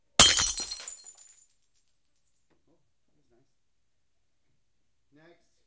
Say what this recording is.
Me dropping a vase off my deck onto a concrete patio.